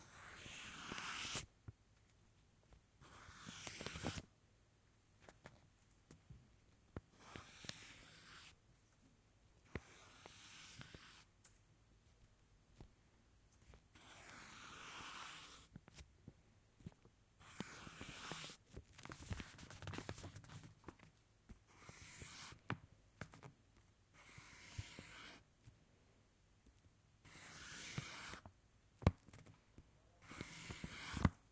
Pencil - drawing lines
Drawing lines with a pencil on paper. Recorded on a Zoom H6. Unprocessed.
pencil, lines, drawing, art, line, stationery, draw, artistic, paper, close, write, writing